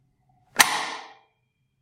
Metallic Bolt Lock 3
A metallic bolt being moved harshly
Close, Open, Slide, Lock, Bolt, Metallic